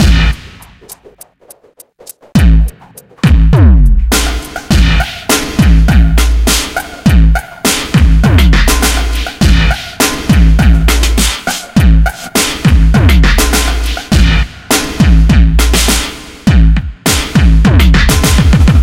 casper 102bpm
A beat with flutter and a 'beep' that somehow reminds me of a ghost.--This is Casper, the little celebration ghost that brightens your day when download hits 100 OK?Imagine what he'll do when we get to one K?
bass, break, beat, going, snare, phat